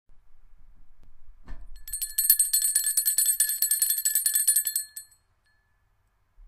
Bell, ringing, ring
Bell, ring, ringing